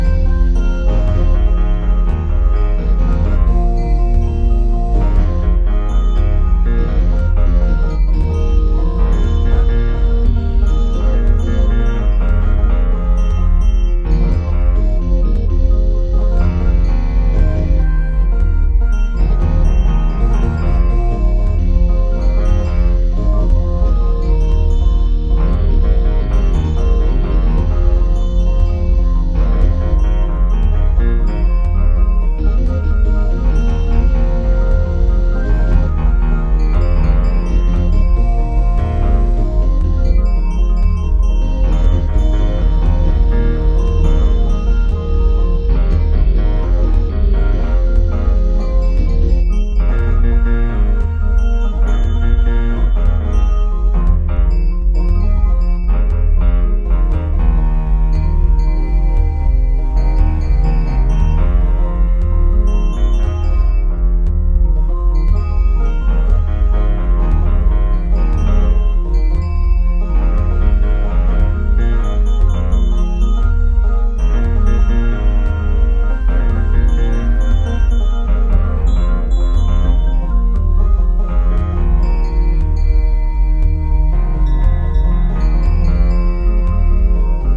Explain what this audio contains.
Gahcomojo Rising Loop
backing, bass, guitar, loop, music, synth